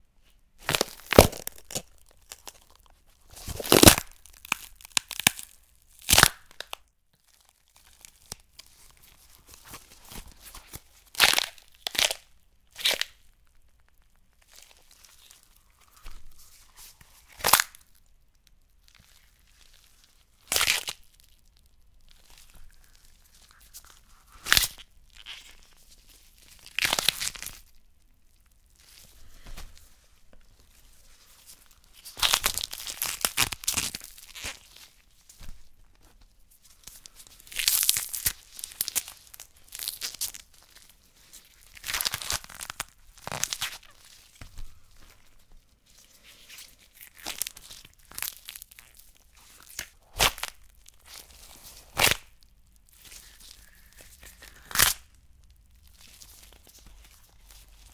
breaking bones
Sweet red peppers recorded using a RODE NT2-A condenser microphone into a Focusrite Scarlett 2i2 audio interface.
Perfect for horror/fighting scenes.
bone, bones, break, breaking, death, flesh, gore, horror, kill, peppers, vegetable